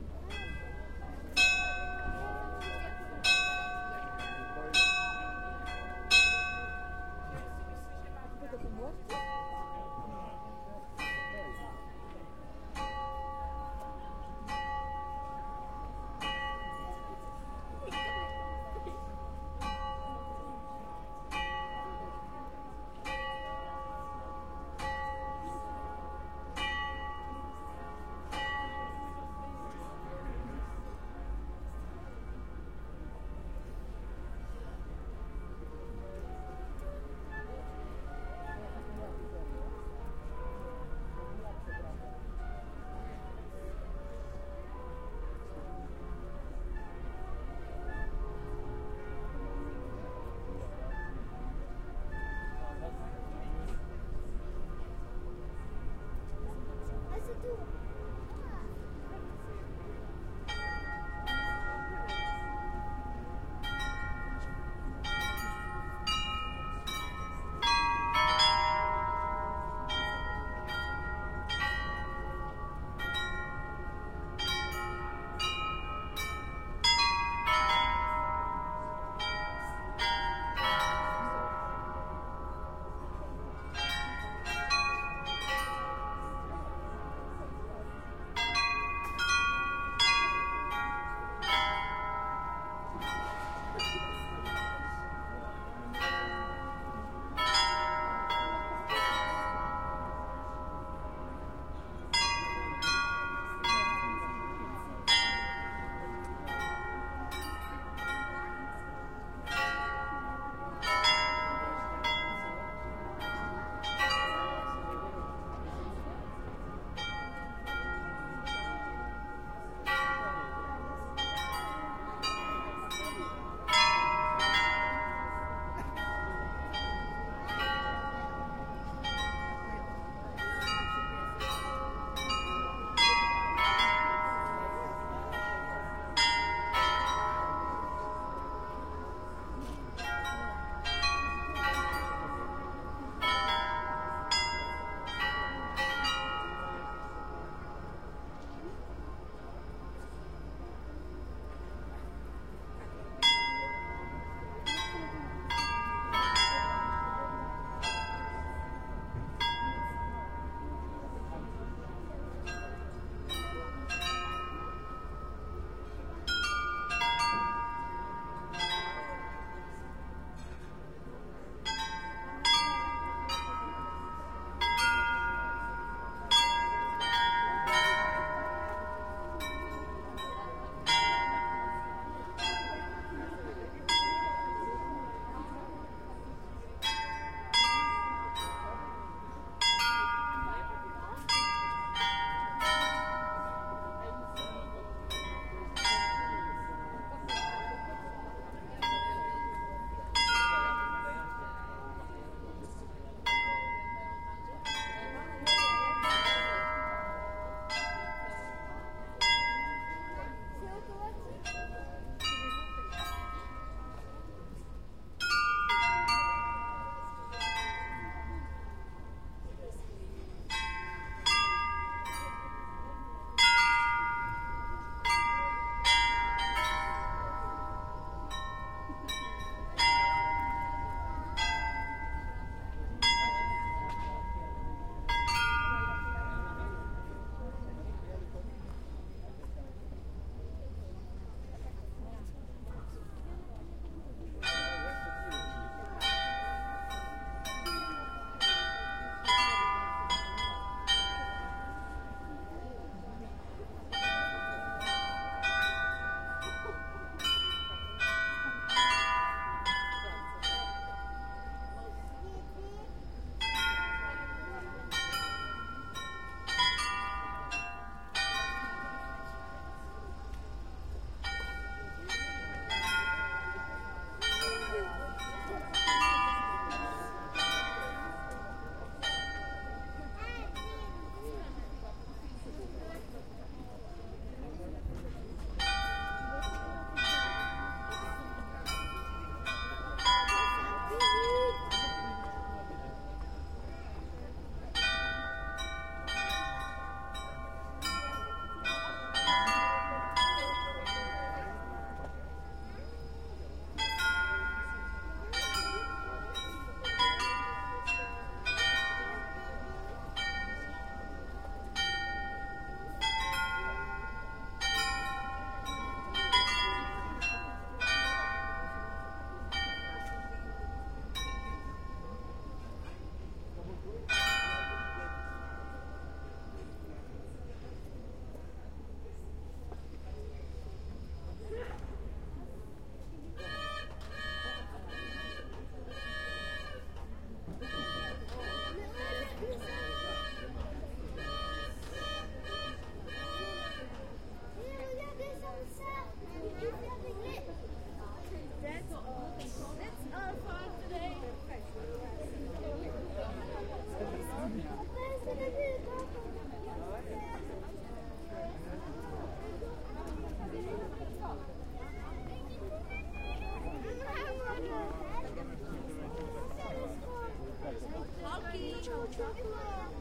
SE ATMO Astronomical Clock Olomuc main square at noon
melodies, clock, astronomical, mechanics